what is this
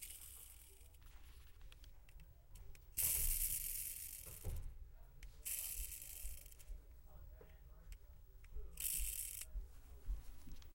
one in a series of recordings taken at a toy store in palo alto.